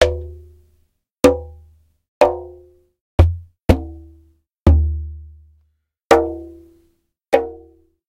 GHANAIAN DRUM 8 HITS
This is a multisample of eight different strikes on a genuine Ghanaian drum. The instrument is similar to a djembe but narrower and made from heavier wood. The drum was a recorded with a Rode NT1 on the top and a Shure SM58 on the bottom. These sources were then phase-matched in Cool Edit Pro.